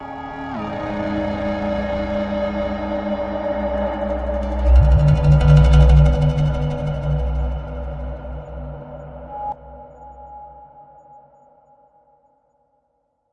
This is a sound of Native Instruments "Metaphysical Function A" Reaktor-Device where a abbey roads plate reverb was applied on.
The sound is additionally processed with my Real Pan device which lets the sound walk from right to left.